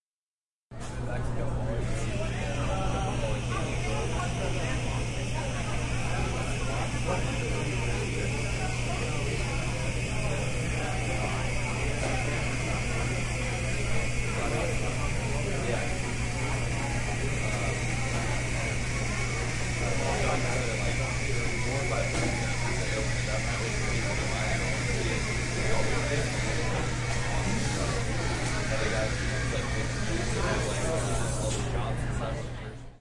milk steamer
This is the sound of milk being steamed with an espresso machine at the CoHo, a cafe at Stanford University.